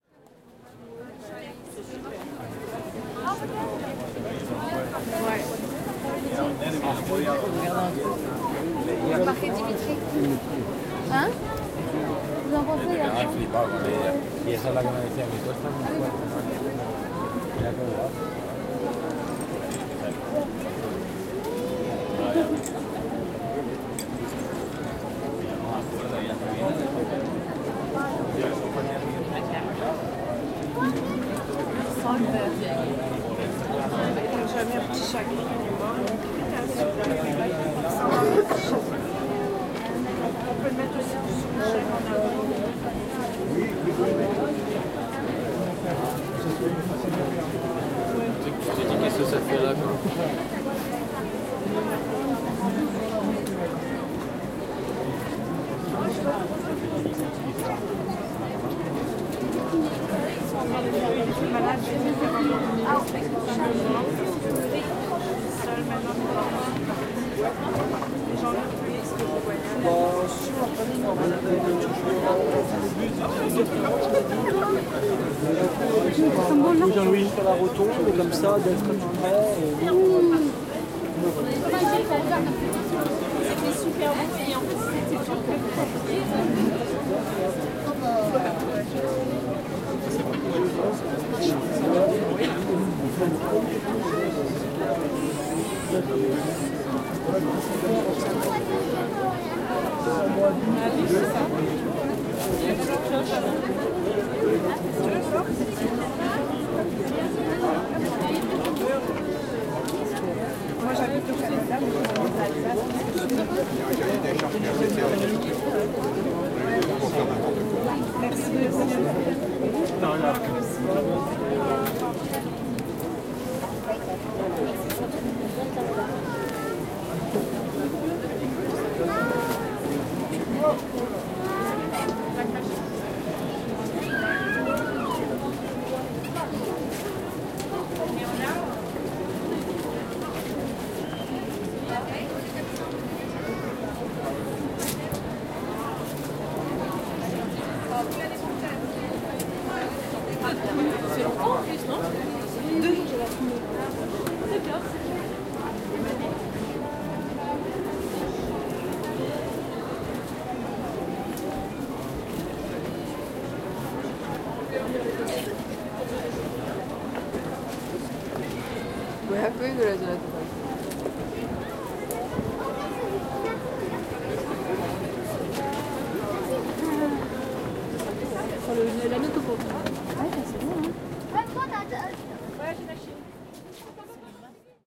The ambiance of the famous Strasbourg christmas market, known as one of the oldest in the world (first edition took place in 1570) and the city's biggest touristic event, gathering thousands of people downtown for one month at the end of every year. I took my zoom h2n in different places, capturing a slightly different mood each time. expect lots of crowd sounds, background music, street atmosphere and... a lot of different languages (french, german, spanish, english.... All recordings made in MS stereo mode (120° setting).